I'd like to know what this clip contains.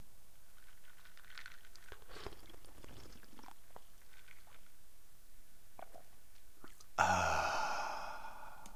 whiskey on the rocks
this track is a mic recording of me taking a whiskey drink from a glass filled with ice cubes, just for fun.....